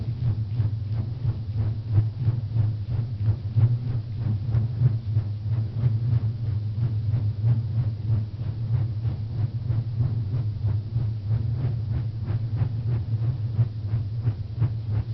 copter.slow.02
sound of a rope swung in front of a mic, pitch lowered
processed, rope